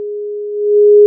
AUDEMAR Emma Devoir2-warming
For this song, I have used differents heartz to created a noise similar to an alarm. It's a disorderly noise that increase little to little to create an effect of fear.
ear, warming, noise